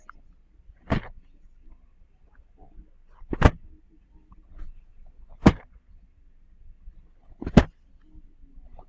Store Tossing Down Small Box2
produce, clunk, ambience, food, crinkle, can, store, checkout, clink, cooling